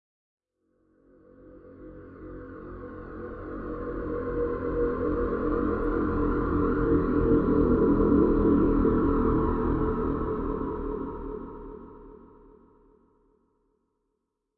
Slowed down frequences vith flanger effect and some manipulations. Enjoy !